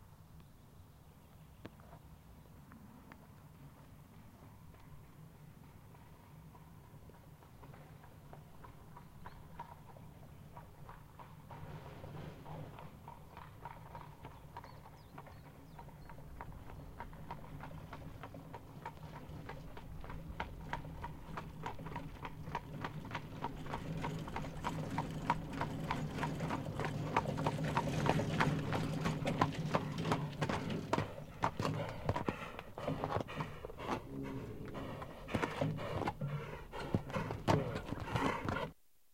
Horse-drawn carriage - Approach and stop
A horse drawn carriage approaches and stops in front of you.
Exterior recording - Mono
carriage, diligence, horse, horse-drawn, stage-coach